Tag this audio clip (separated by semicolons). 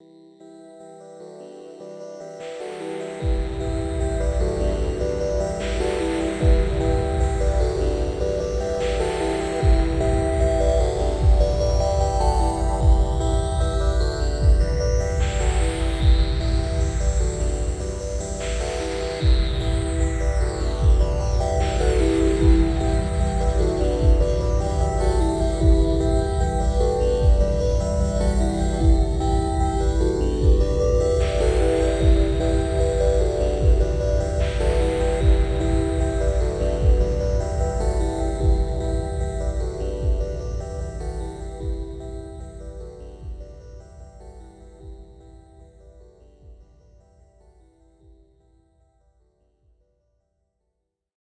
Psychedelic; teaser; Ambient; Elementary; Dreamscape